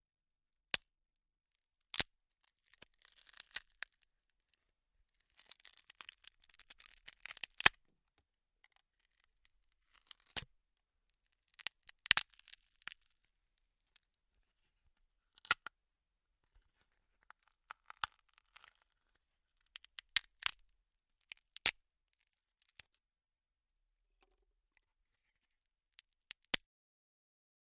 SFX, wood, crack, destroy, burst